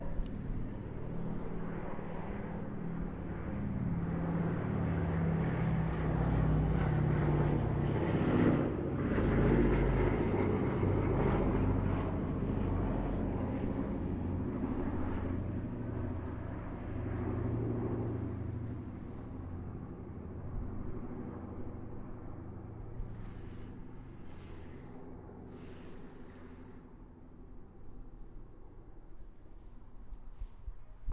a very brief encounter with a B17 Flying Fortress 'Sally B' as she flew over Belfast on the 14 Sept. 2013. General background noises may include dogs barking, children playing in the distance, passers by and a garden strimmer being used nearby.